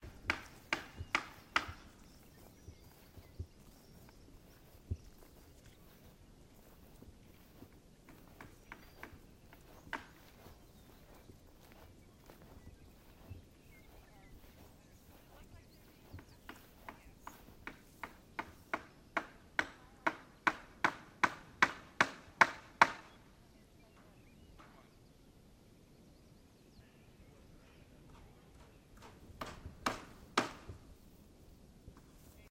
Zoom H6 Shotgun recording of hammering at an outdoor construction site in rural Manitoba, Canada.
Hammer, Outdoors, Nail, Building